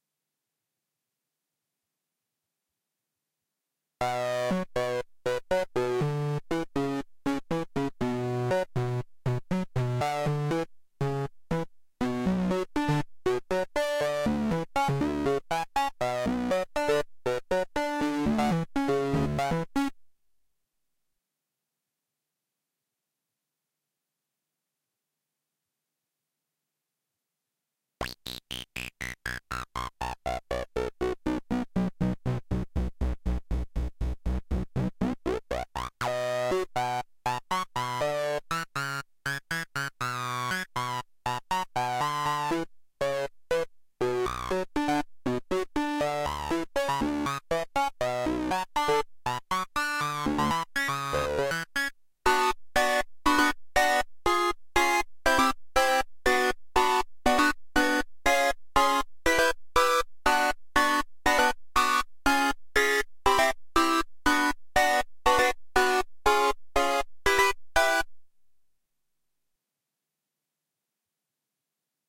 rave sound made with a Virus Access A
electronic, rave, techno, virus